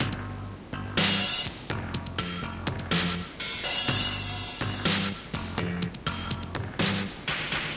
Cass 011 A CisBack Loop01
While looking through my old tapes I found some music I made on my Amiga computer around 1998/99.
This tape is now 14 or 15 years old. Some of the music on it was made even earlier. All the music in this cassette was made by me using Amiga's Med or OctaMed programs.
Recording system: not sure. Most likely Grundig CC 430-2
Medium: Sony UX chorme cassette 90 min
Playing back system: LG LX-U561
digital recording: direct input from the stereo headphone port into a Zoom H1 recorder.
Amiga, Amiga500, bass, chrome, collab-2, Loop, Sony, synth, tape